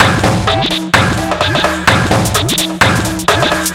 128BPM LOOP, 4 4 j14k
little loop created on Arturia Spark
house, 128bpm, dance, spark, 4, drum, loop, music, arturia